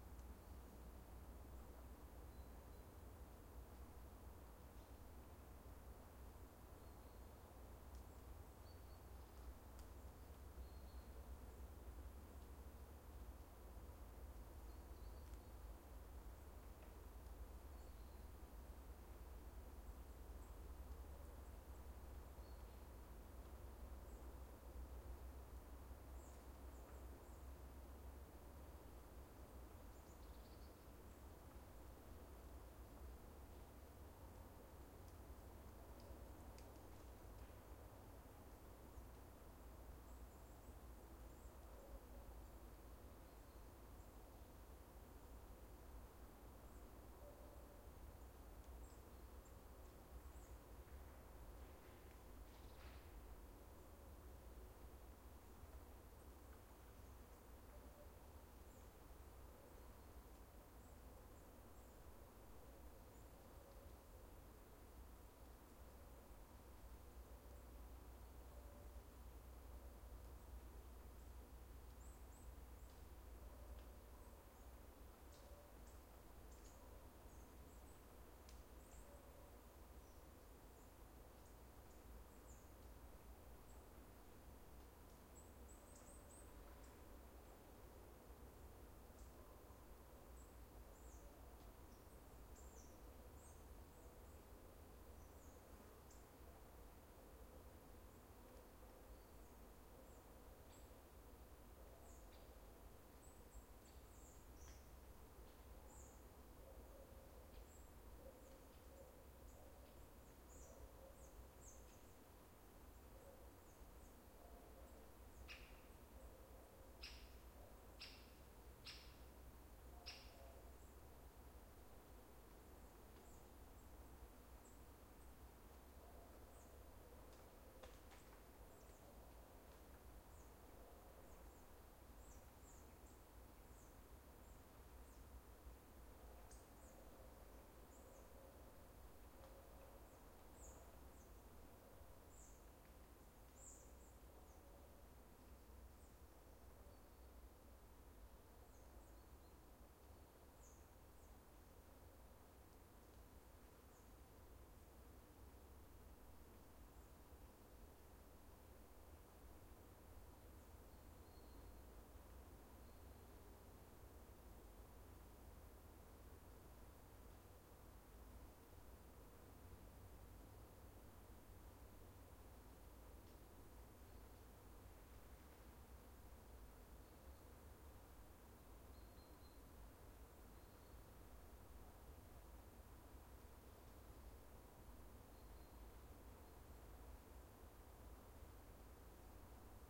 Forest 8(traffic, cars, birds, leaves, trees)
nature, ambient, forest